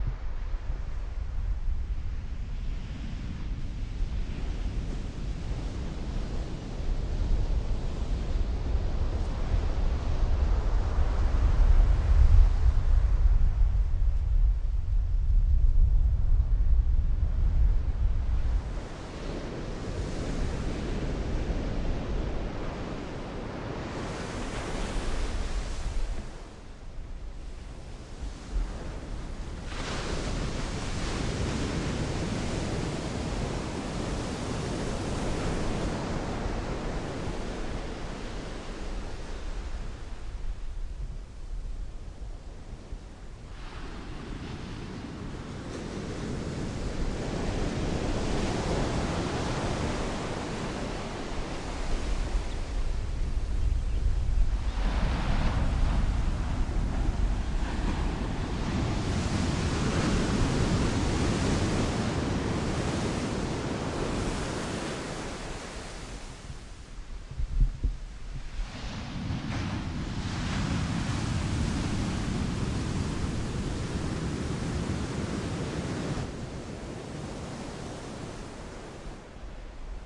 Sandy Surf 4 161205 mono
Waves, distant, crashing. - recorded on 5 Dec 2016 at 1000 Steps Beach, CA, USA. - Recorded using this microphone & recorder: Sennheiser MKH 416 mic, Zoom H4 recorder; Light editing done in ProTools.
water, ocean, waves, field-recording, beach